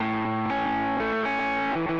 Randomly played, spliced and quantized guitar track.